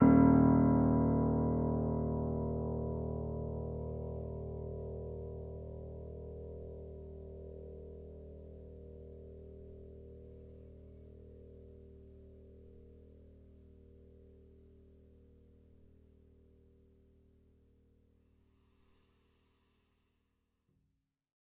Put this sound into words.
upright choiseul piano multisample recorded using zoom H4n
piano, choiseul, upright, multisample